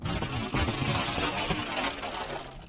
ZOOM0002 XY Edit
Gravel hitting metal sheet/slide
sound, classwork, field-recording